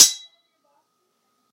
Sword Clash (51)
This sound was recorded with an iPod touch (5th gen)
The sound you hear is actually just a couple of large kitchen spatulas clashing together
ding, clang, struck, iPod, ting, ringing, clashing, hit, slash, metal, ring, strike, metal-on-metal, stainless, clash, knife, clanging, sword, clank, slashing, impact, swords, steel, ping, metallic